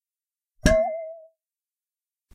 Small flask stuck in a mug which i dropped on the floor.
interesting sound which i then recorded three times slightly different microphone settings.
Used Swissonic Digital Recorder with stereo mics.